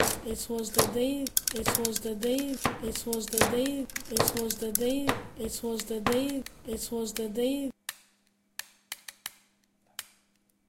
SoundScape GPSUK Ayesha,Ester&Rojin 5W
cityrings, galliard, soundscape